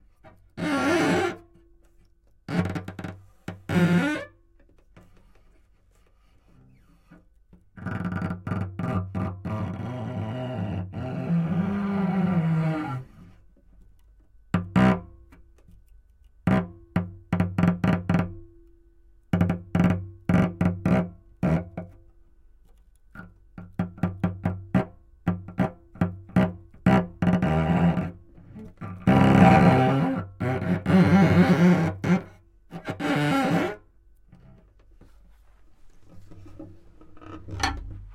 Cello Contemp-Techniques2
Contemporary String Technique used to produce some SFX on a Cello.
Cello, Horror, Sounds, SFX, Flageolet